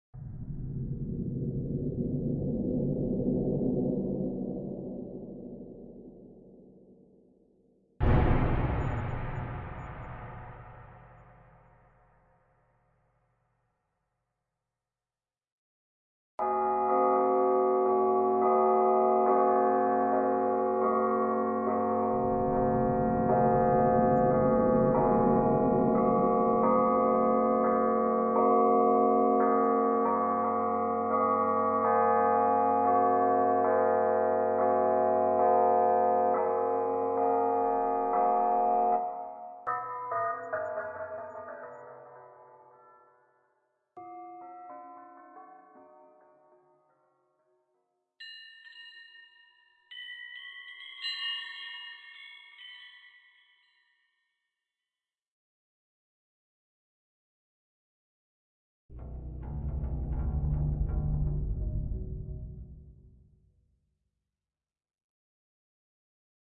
Horror pack 2
Some sounds to horror movie
creepy, evil, horror, scary